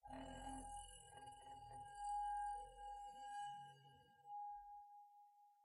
cristal grinçant V5-1
harmonic sounds of a crystal glass excited by wet finger
a, crystal, finger, glass